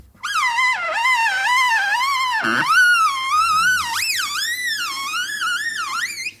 sounds produced rubbing with my finger over a polished surface, my remind of a variety of things